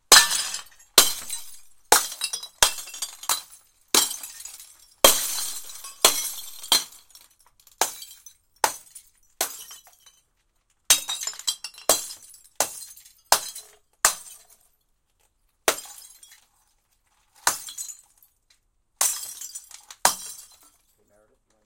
Bottle Smashes Hammer Hits Finale FF230
Multiple loud bottle smashing, tingling, high-pitched, hammer, liquid, shattered glass falling on glass